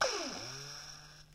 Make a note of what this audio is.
recorded from a Dyson vacuum cleaner